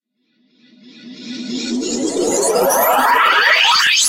A couple of synthesizer noises mixed, delayed and then reversed to give a bizarre rising sweep.